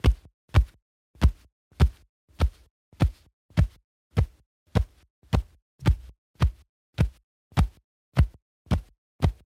Beating Chest Whilst Wearing Suit
Recording of me beating my chest whilst wearing a suit.
Mid frequency fabric rustle, low-mid frequency and bass thuds.
Recorded with an Aston Origin condenser microphone.
Corrective Eq performed.
chest, thud, wearing-suit, hitting, beat, rustle, rustling, thudding, hit, fabric, suit, beating